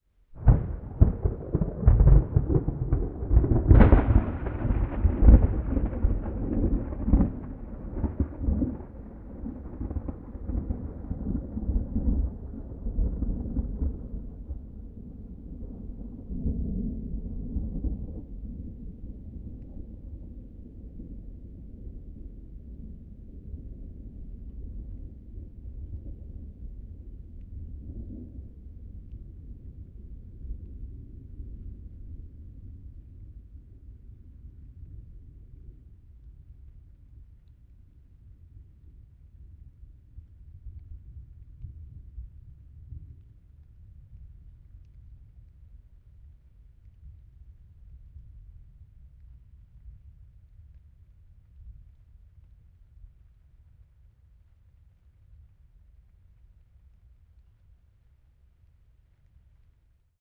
4-Channel surround (IRT-cross/25cm) record of a lightning and rolling thunder with (nearly) no rain.
The spatial impression is best if headphones are used.
CH1 = FL
CH2 = FR
CH3 = RL
CH4 = RR
Another very popular thunder clap maybe also interesting:
The Download-file is a PolyWAV.
If you need to split the file (e.g. to make a stereo file), you can use the easy to use
from Sound Devices for example.
360-degree, 4-Channel, ATMO, Field-recording, High-Quality, HQ, IRT-cross, Nature, Rode-NT1-A, Surround, THUNDER, Thunder-without-Rain